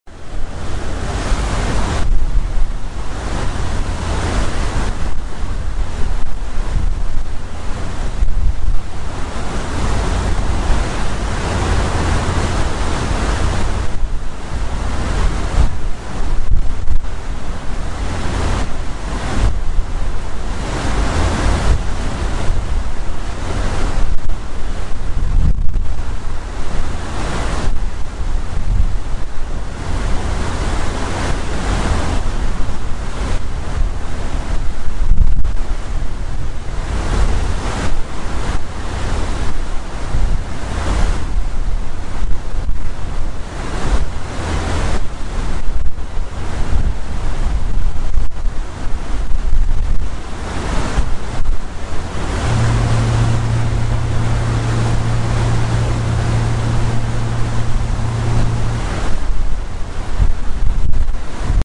This is the crashing sound of the bow of the M/V Coho as it breaks through the ocean on its way to Victoria, British Columbia. The Coho is a passenger and vehicle ferry owned and operated by Black Ball Line. Black Ball's only ferry, the Coho carries passengers and cars, trucks, semi-trailers, bicycles, etc. between Victoria, British Columbia and Port Angeles, Washington.